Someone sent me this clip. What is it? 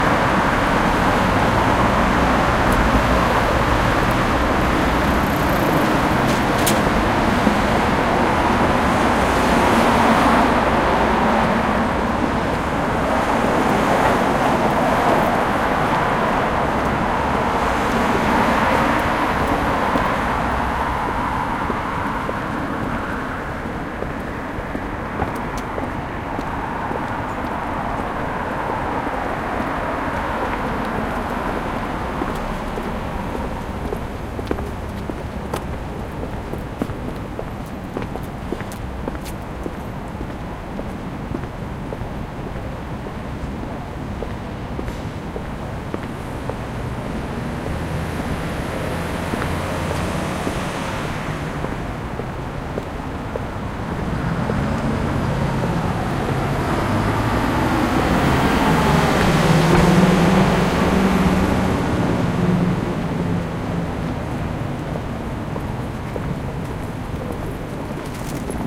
One of the many field-recordings I made in Tokyo. October 2016. Most were made during evening or night time. Please browse this pack to listen to more recordings.